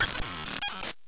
Recording a looper back into itself while adjusting the looper start and end positions. Iteration #1
glitch, harsh, loop, mean, noise, sound-design